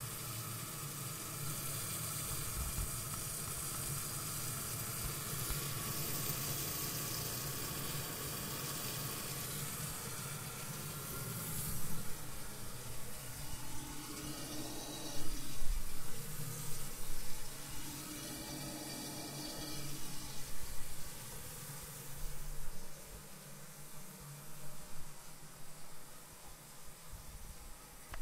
toilet after flushing
recorded with tascam dr-07mkII